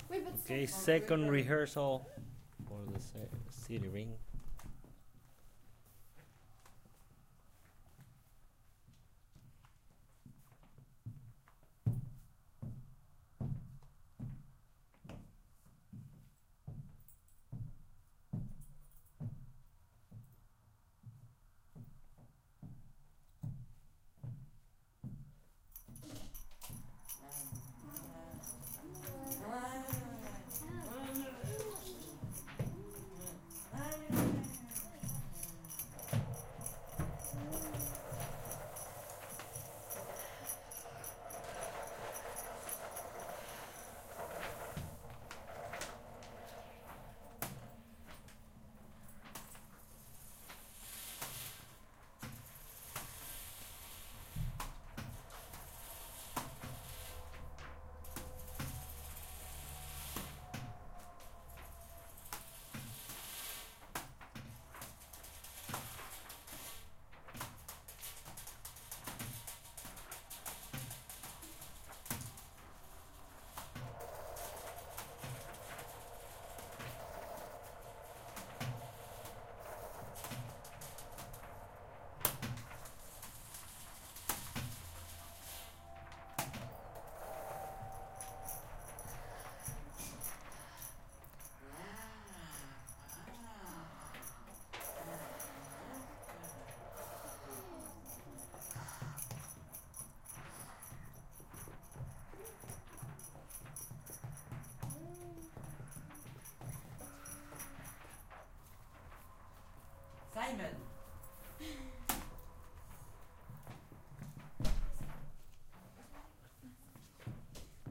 MySounds GWAEtoy rehearsal
TCR, field, recording